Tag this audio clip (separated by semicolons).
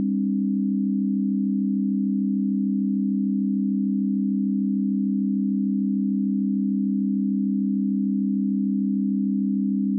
chord; pythagorean; ratio; signal; test